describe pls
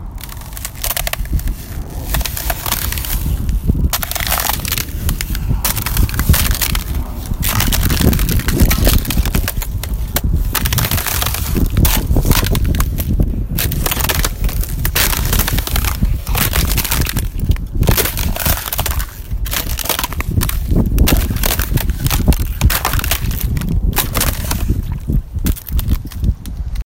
Walking on ice on Chicago sidewalk.